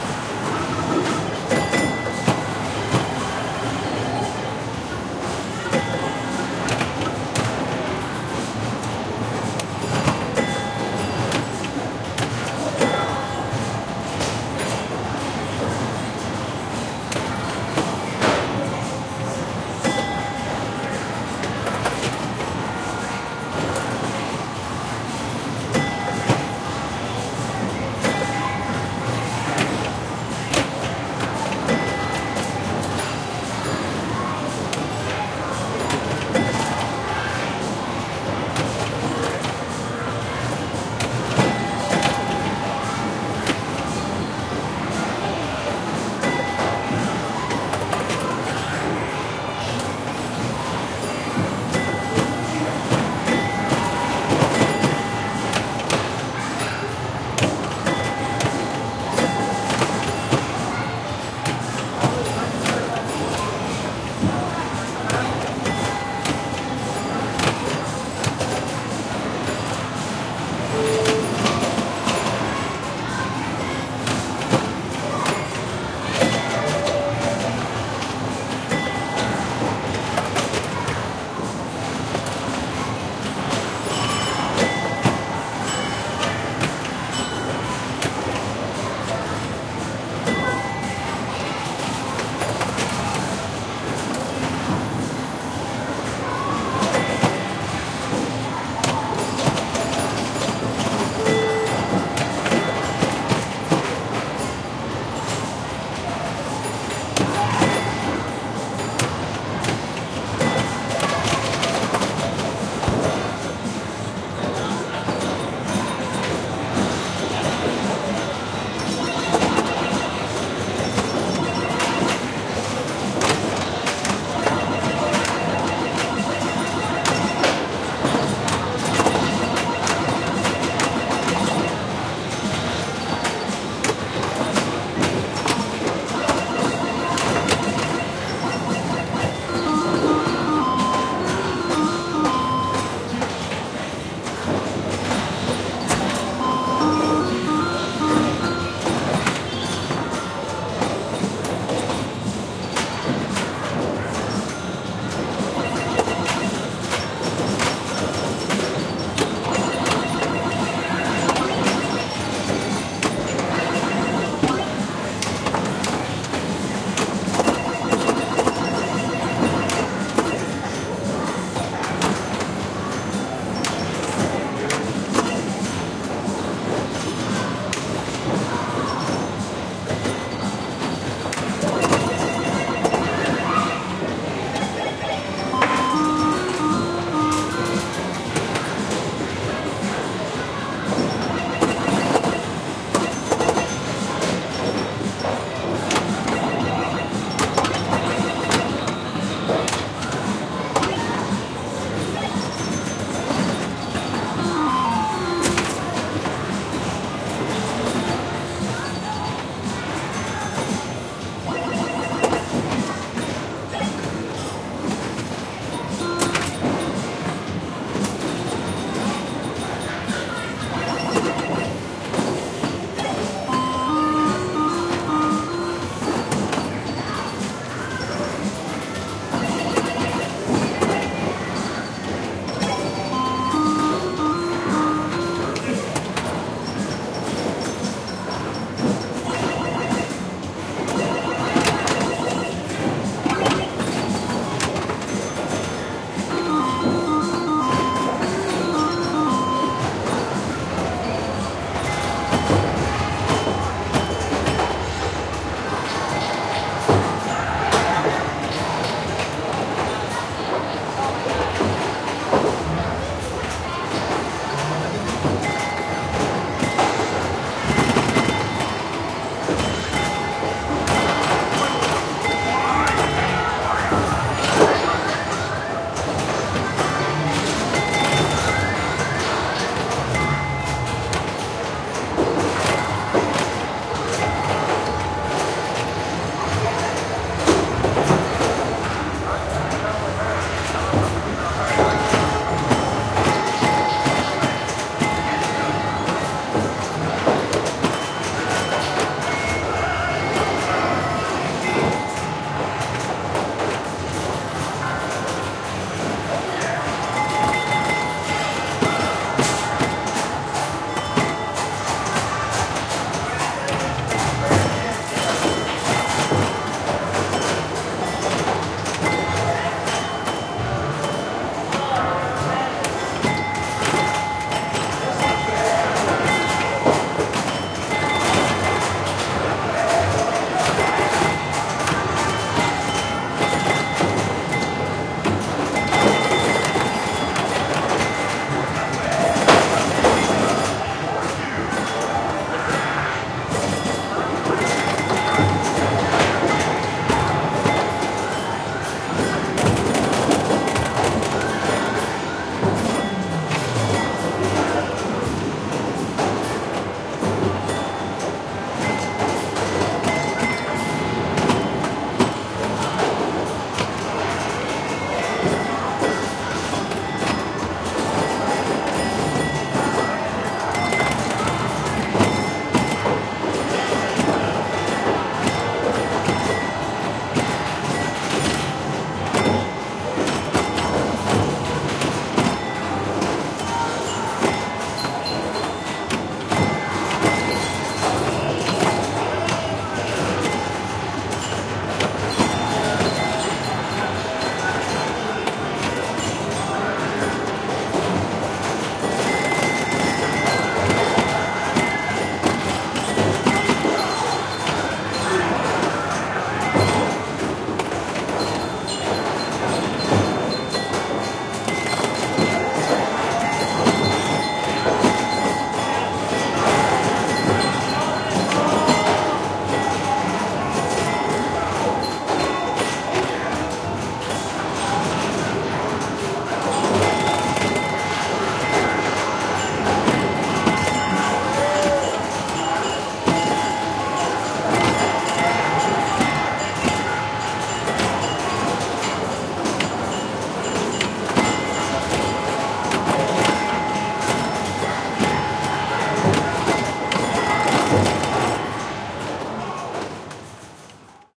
Recordings of vintage 1960-70s Pinball machines being played at the Silver Ball Museum, Asbury Park, New Jersey, Jersey Shore, USA
Sony PCM-D50